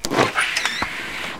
Window Opening Squeaks Slide
Opening my attic's window. Recorded with Edirol R-1 & Sennheiser ME66.
squeaky, rubber, opens, opened